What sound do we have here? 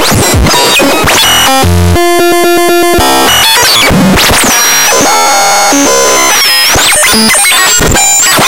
Glitch sound fx.